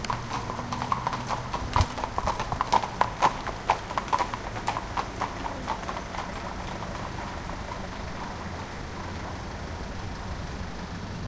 Recording around the "Stefansplatz" in vienna.